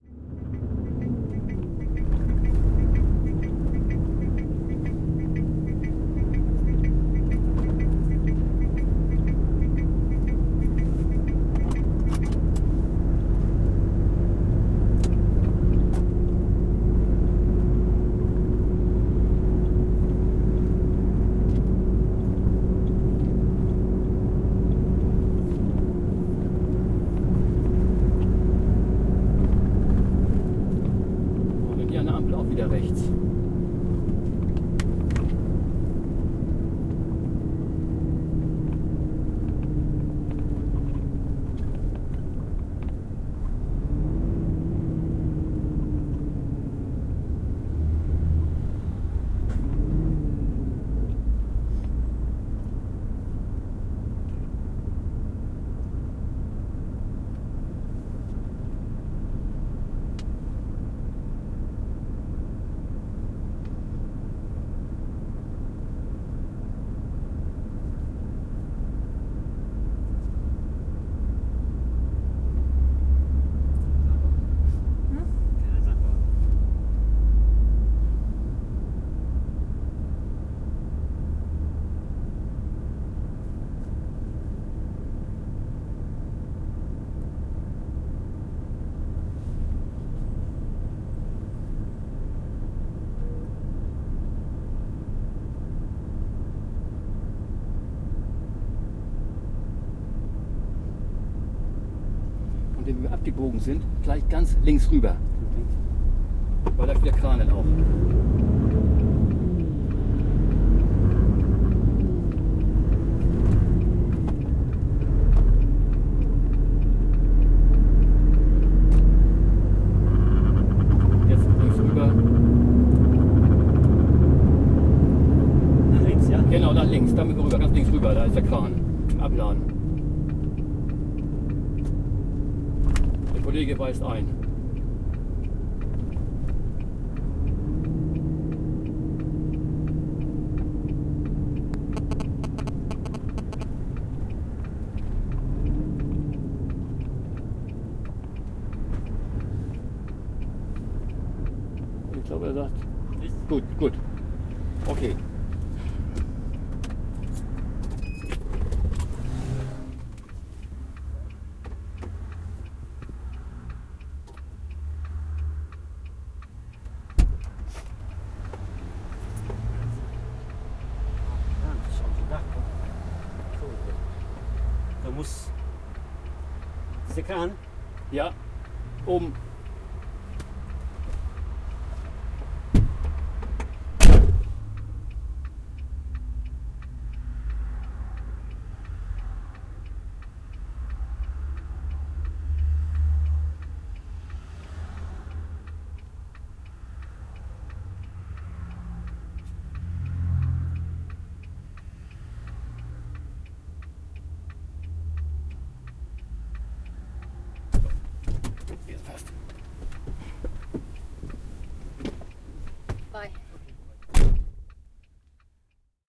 110819-worker bauer in hamburg
19.08.2011: twentieth day of ethnographic research about truck drivers culture. Hamburg in Germany. The ambience of truck cab during driving. We are giving the German worker Bauer a lift. Sound of truck engine and voice of navigating Bauer from time to time.
engine, field-recording, german, male, noise, truck, truck-cab, voice